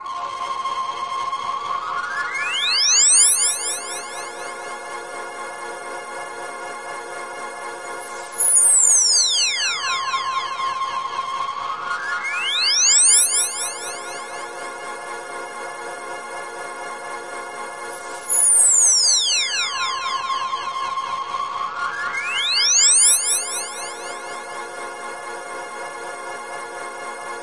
broken pad
A pad I made. Sounds "broken".
pad, broken